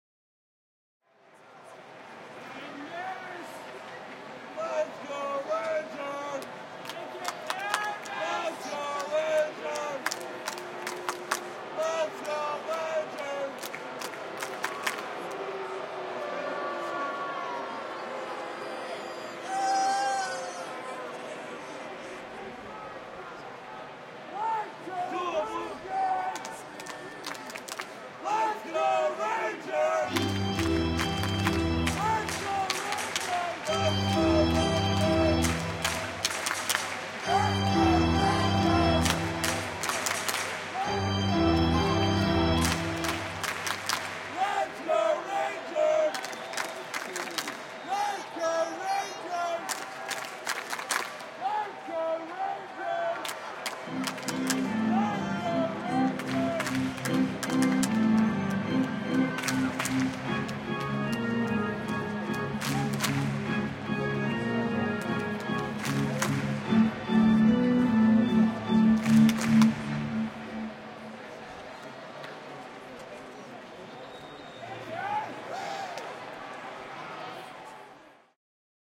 This was recorded at the Rangers Ballpark in Arlington on the ZOOM H2. Crowd chanting, "Let's Go Rangers!" to the organ. Some booing.